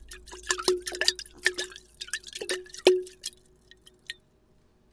Jones Natural Sloshy
Shaking bottle full of water.
glass-bottle, toot, bottle, whistle, blow